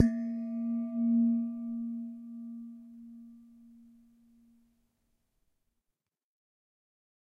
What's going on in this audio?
Couv Verre 1